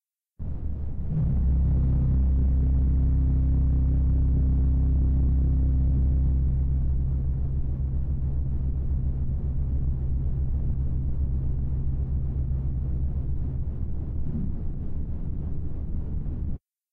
pre-recorded organ sounds run through a SABA television at high volume; recorded with peak and processed in Ableton Live